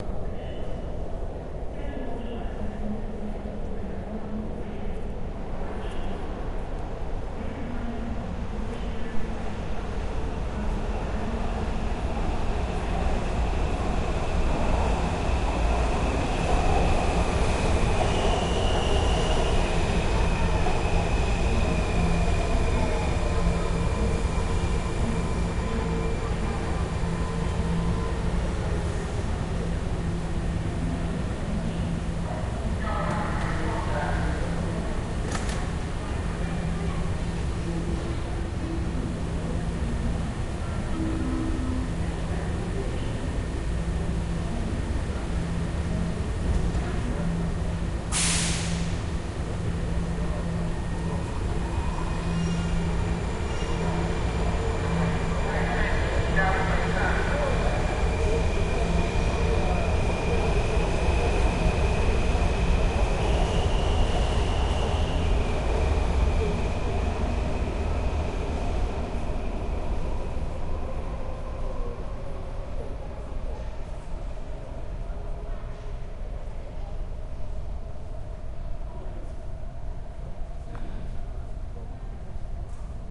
Subway in Washington DC from outside
Subway station in Washington DC. Made in underground station inside the coach with passenger chatter.
subway, subway-undergroung